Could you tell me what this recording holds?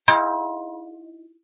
Thin bell ding 2
A short, thin bell chiming.